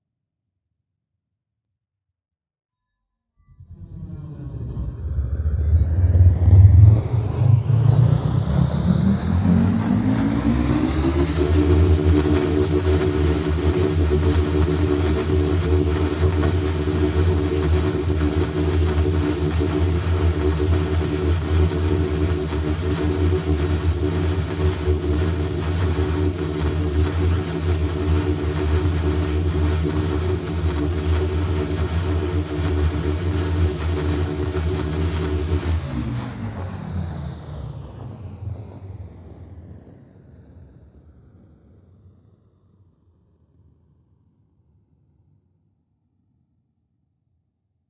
More sounds from my reaktor engine synth. This one is a large turbine engine buried deep within the body of some mechanical monstrosity of some sort.